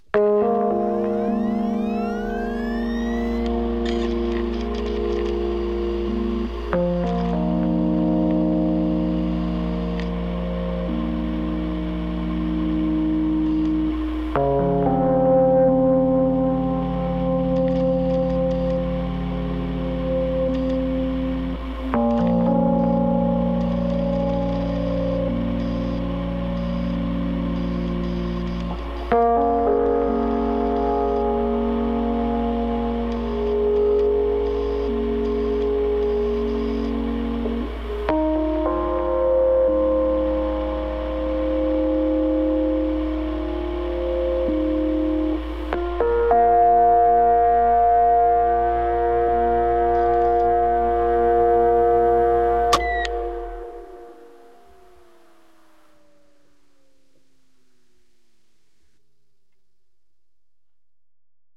hard(drive)decisions
Combination/Remix was suggested by LimitSnap_Creations. Thanks!!
Stretched and pitched the hdd-sound a little for optimal fit, also some sidechain compression gives the rhodes more space to breath.
ambiance, rheynemusic